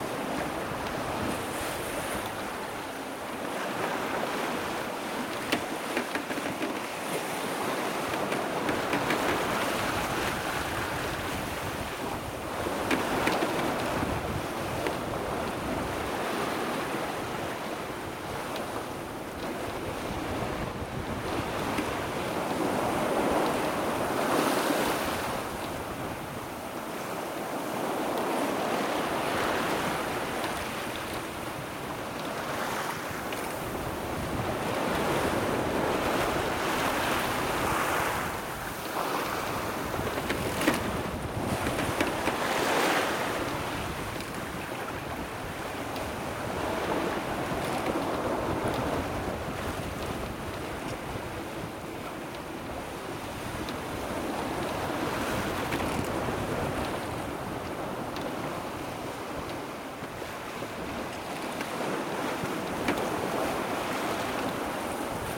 Sailing from Spain to Canary islands in October with a 12 meter yacht. Wind speed was around 17 knots. I positioned the recorder in the cockpit. Recorded with an Olympus LS-12 and a Rycote wind shield.